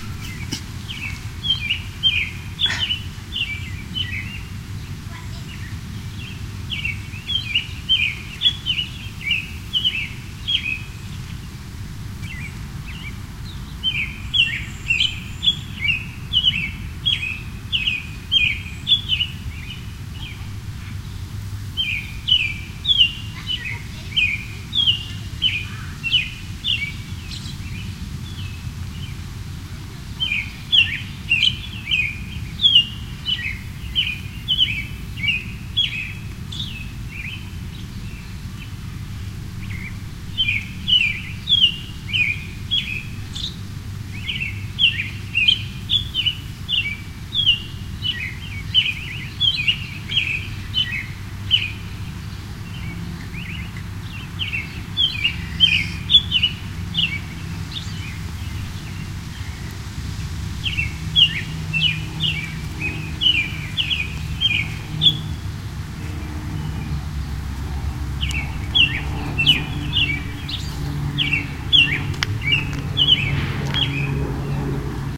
Bird Calls in Backyard
this is a recording of a midwest backyard focusing on bird calls.
birdcalls, midwest, nature